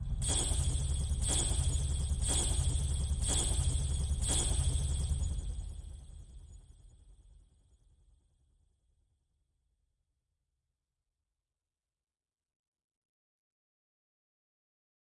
I wanted to make a sort of laser gun, but I think it turned more into some kind of flight sound.